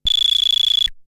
moog mod filter4
Moog Prodigy modulation filter sounds
Recorded using an original 1970s Moog Prodigy synthesiser
fiction, retro, science, 70s, prodigy, synthesiser, moog, sci-fi, space, effect, synth